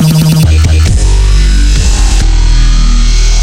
Dubstep wobbles 140bpm
140bpm dubstep loop.
140, dirty, drop, dubstep, filthy, heavy, loop, wobble